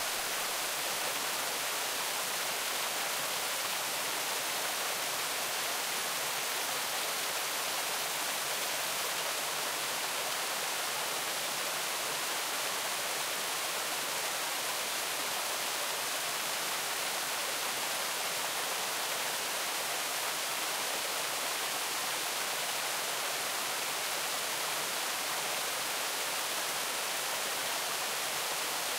Small Waterfall at the Mill
This is a loop of a small waterfall next to the Old Mill at Berry College
waterfall, small